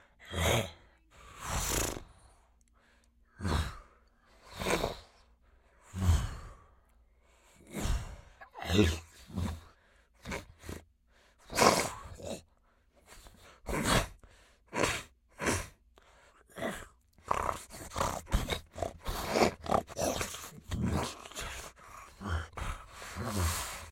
Velociraptor Huffs
dinosaur, huff, velociraptor